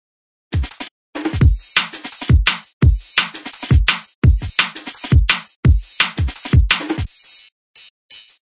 dnb170break
I've made this break in ableton. I consists of tuned & eq'ed kick and snare fat dnb samples, and two chopped & processed classic funky breaks. bpm=170. I've added small compression on the mix bus. big up crew !
drums, 170bpm, funky, loop, break